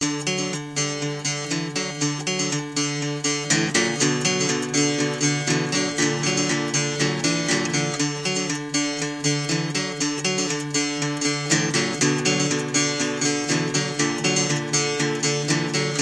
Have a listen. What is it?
DGHU Guitar
A collection of samples/loops intended for personal and commercial music production. All compositions where written and performed by Chris S. Bacon on Home Sick Recordings. Take things, shake things, make things.
acapella, acoustic-guitar, bass, beat, drum-beat, drums, Folk, free, guitar, harmony, indie, Indie-folk, loop, looping, loops, melody, original-music, percussion, piano, rock, samples, sounds, synth, vocal-loops, voice, whistle